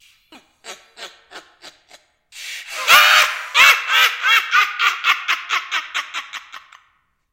OF like laugh
This is me trying to do a laugh similar to flowey/Omega flowey from undertale.
demonic, flowey, insane, laughter, omega